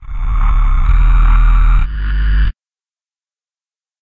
guacamolly pan bass
3 low grinding bass notes. Low to high(er).